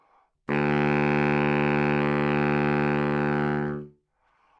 Sax Baritone - D3
Part of the Good-sounds dataset of monophonic instrumental sounds.
instrument::sax_baritone
note::D
octave::3
midi note::38
good-sounds-id::5529
sax, good-sounds, single-note, neumann-U87, baritone, multisample, D3